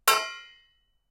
Ting sound from a metal cup
A short sample of a metal spoon hitting a metal cup resulting in a TING! (or DING!) sound. Recorded with a Sony PCM-M10.
cup, ding, metal, recording, spoon, stereo, ting